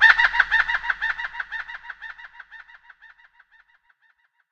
reinsamba Nightingale song happydub5-rwrk
reinsamba made. the birdsong was slowdown, sliced, edited, reverbered and processed with and a soft touch of tape delay.